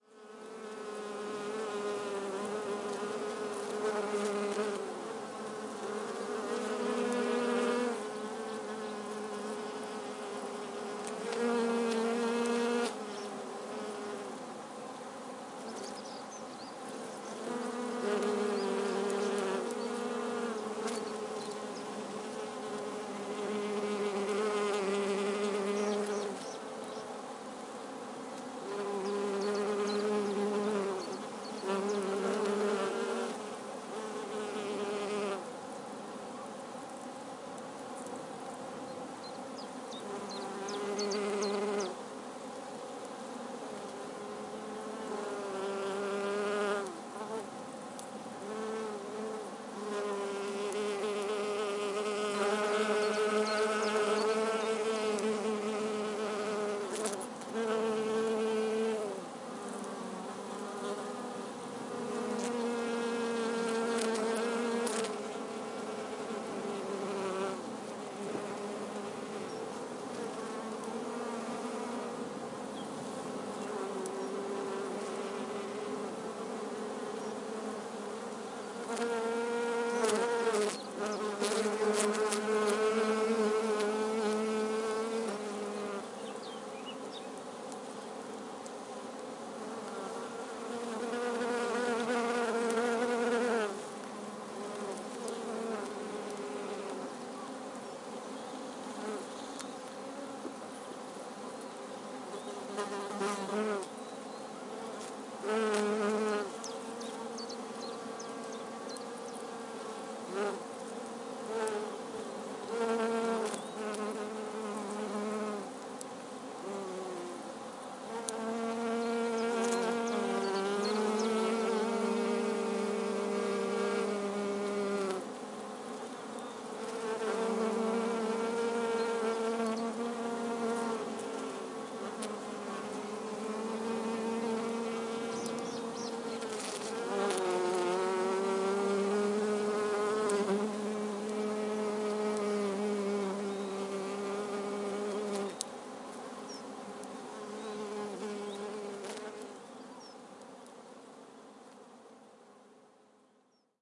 2 foraging bees
deux abeilles noires butinent des fleurs de bruyères en gros plan.
l'une et l'autre, parfois ensemble.
En fond sonore le bruit typique de la congrégation des mâles.
On entend les ajoncs craquer au soleil et quelques oiseaux.
Ile de Groix, Bretagne, France, été 2021
On peut écouter le son de la congrégation des mâles ici:
recorded withe Schoeps cmc6 mk41
recorded on Sounddevice 633
Two black bees (apis mellifera mellifera)are foraging, close up.
Background are flying lots of male bees. Birds, furze cracking at the sun
abeille, bee, beekeeping, bees, britany, butineuse, buzz, buzzing, groix, insect, insects, summer